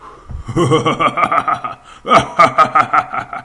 Evil Laugh
Just an evil-sounding laugh I like to do for comedic effect. It's best used when it is unexpcted. I hope this makes its way into some game somewhere.
villain, laughter, laugh